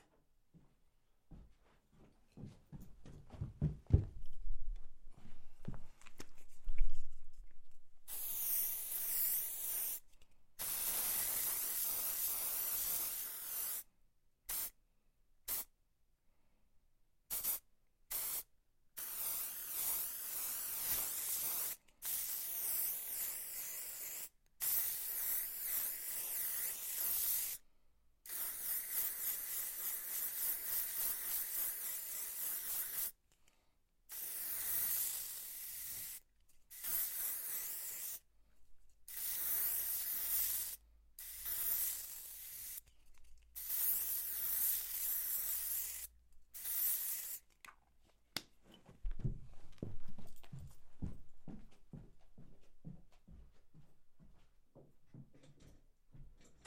spraying a paint.
Recorded with TLM103.
Hope you'll enjoy it.